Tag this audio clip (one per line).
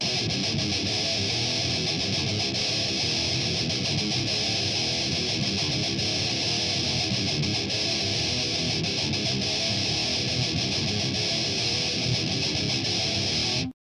groove
guitar
heavy
metal
rock
thrash